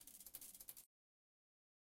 water rain light
Light rain in a storm environment within a game